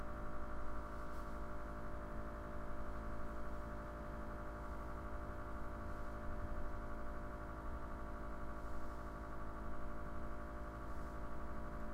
Great for background noise in a factory or workshop setting, or for a noisy kitchen.